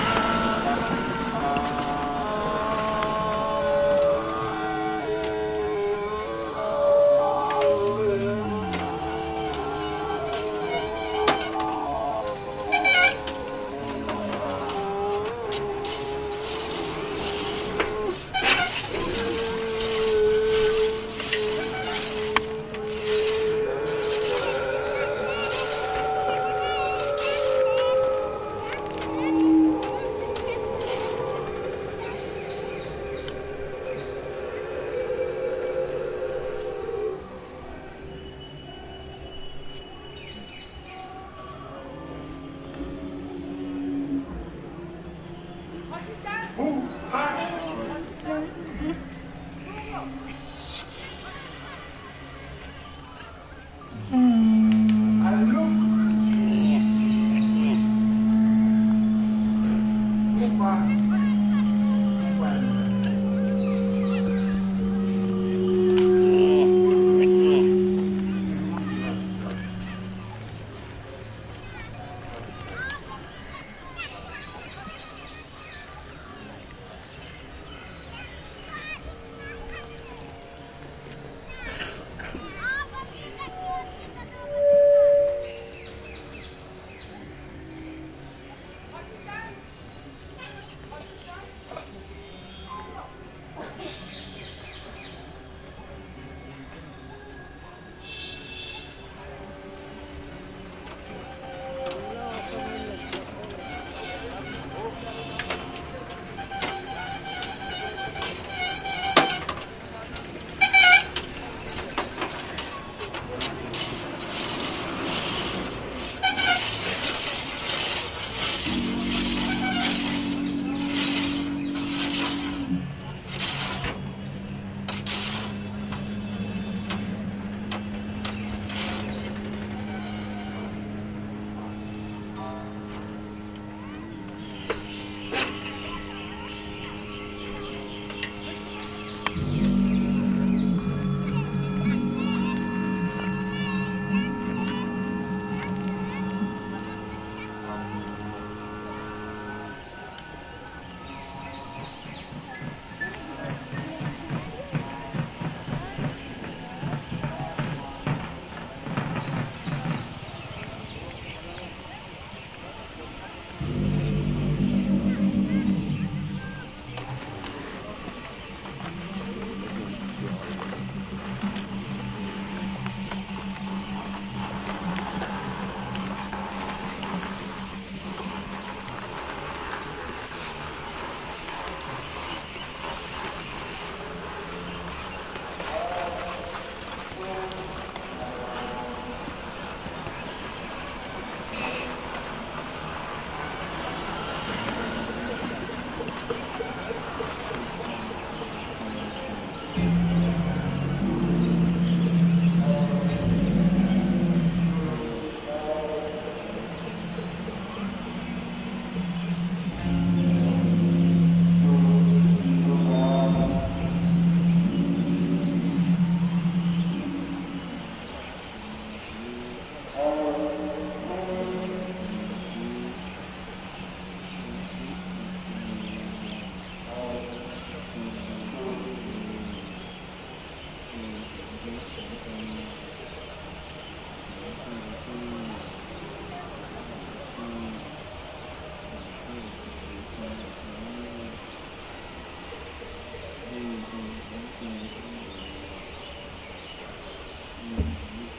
the streets of Bod Gaya around a temple